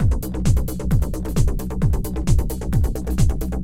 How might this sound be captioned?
drum loop and bass